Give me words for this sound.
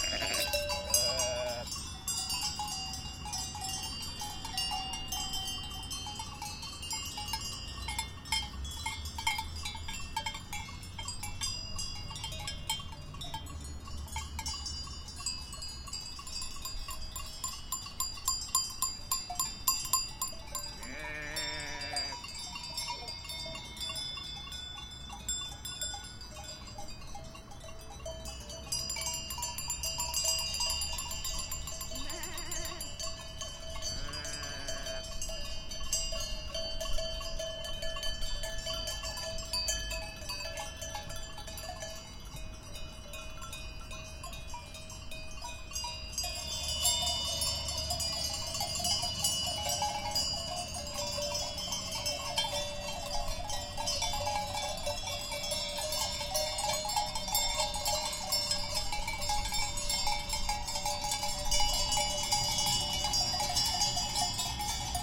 Flock of Sheep -Nov. 2011- Recorded with Zoom H2
bellbleat, countryside, environmental-sounds, Farm, field-recording, Flock, Lamb, Sheep, Switzerland